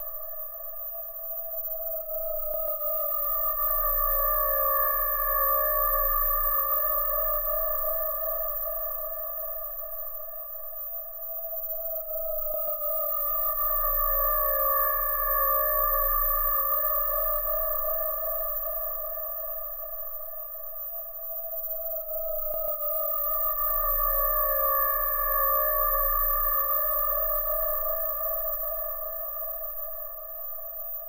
Space waves 2
A collection of Science Fiction sounds that reflect some of the common areas and periods of the genre. I hope you like these as much as I enjoyed experimenting with them.
Alien, Electronic, Futuristic, Machines, Mechanical, Noise, Sci-fi, Space, Spacecraft